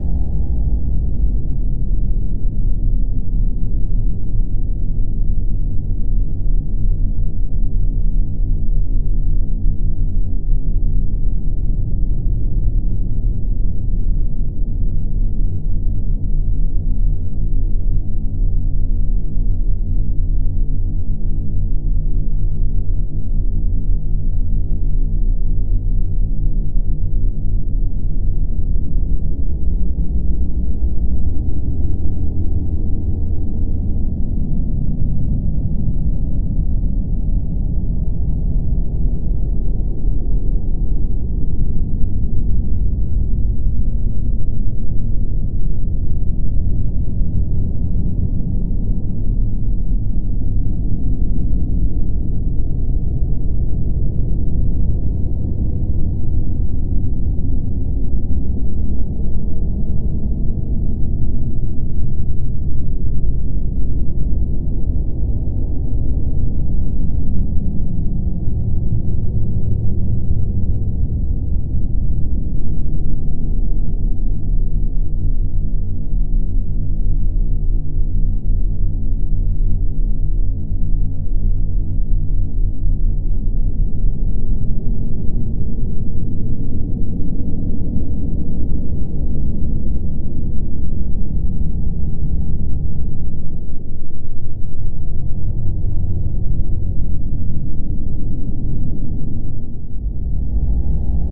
Spooky Ambiance
A dark, creepy, 100% loopable ambient track for when you need to create a spooky atmosphere. Lots of extremely low-frequency tones present throughout (hope you have a really powerful sub!). This was made in Audacity.
spooky, horror, ambient, loopable, scary, seamless, creepy, dark, loop, suspense, eerie, fear, rumble